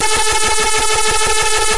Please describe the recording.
Sci-Fi Alert 08
Science Fiction alert / error
Warning, Alert, Sci-Fi, Error, Science-Fiction, Notification